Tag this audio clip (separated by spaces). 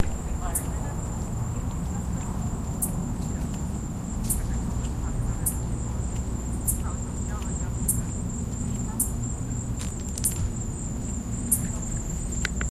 digital electet field-recording microphone people test